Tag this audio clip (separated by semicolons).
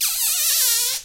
bag; plastic; squeak